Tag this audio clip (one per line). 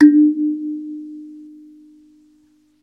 piano
bailey
bells